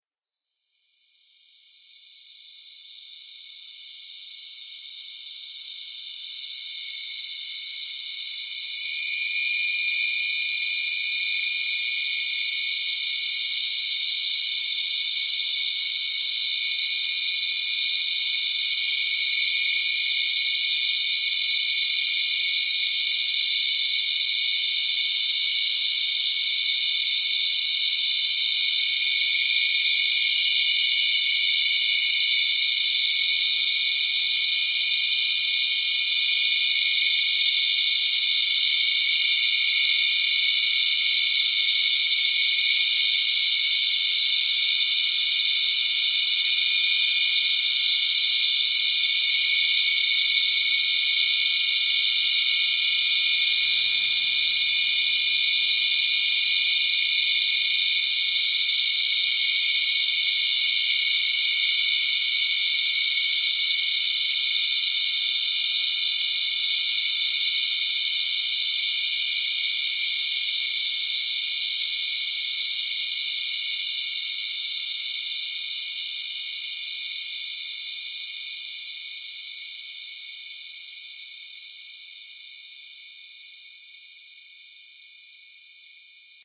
thick synthesized bugscape
swarm, bugs, insect, nature, swamp